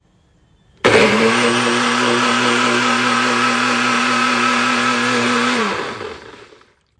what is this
It is a sound that feels thunderous, at the beginning it is very strong but at the end it is perceived as it loses its power and turns off. Had to be activated by someone when turning on the mini blender.
Blender, food, juices, kitchen, mini-blender, shakes